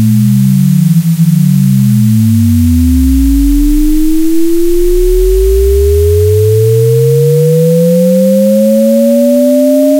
left: Random[] + 5*Sin[(220 + 50*t)*Pi*t] / right: 0.75*Random[] + 7*Cos[(440 - 50*t)*Pi*t] for t=0 to 10
mathematic, formula